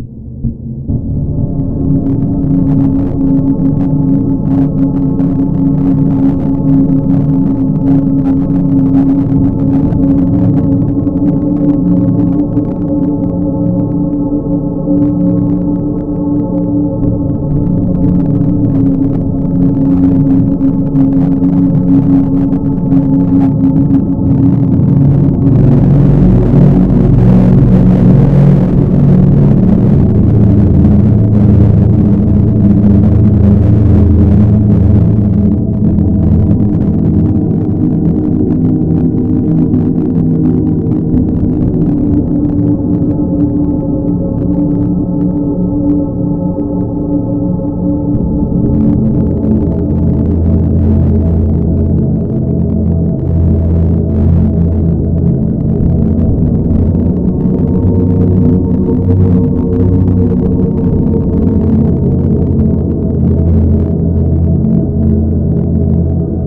DEPTHS OF HELL'S SOUND BY KRIS KLAVENES
hope u lie it did it on keyboard on ableton live :D
creepy, depths-of-hell, dramatic, haunted, nightmare, old, sinister, sound, spooky, terrifying, terror